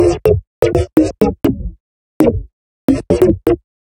artefacts loop
Pickups from unshielded wire put through gate unit and envelop-controlled lowpass, both triggered by noise peaks exceeding a certain threshold.
rhythm, 122-bpm